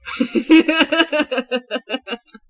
real laugh taken from narration screw ups